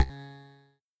Ping Sound Ricochet
Ping sound with mid freqs - synthetic bounce
interface, press, select, menu, game, button, click, short, option, hi-tech, switch